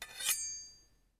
metal-blade-friction-6
metal metallic blade friction slide
metal, blade, friction, metallic, slide